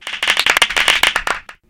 small applause-short deepA
a short applause track of about 5-10 people without reverb. completely fabricated using a recorded clap sound.
clapping, environmental-sounds-research, applause, crowd, clap